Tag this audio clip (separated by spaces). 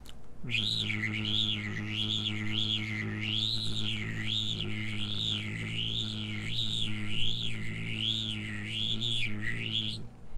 Electric Electricity Sound